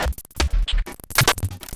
tweaknology glitchsquelch02
made with black retangle (Reaktor ensemble)
this is part of a pack of short cuts from the same session
click, computer, cyborg, digital, effect, electro, fx, glitch, hi-tech, lab, mutant, noise, robot, sci-fi, soundeffect, soundesign, transformers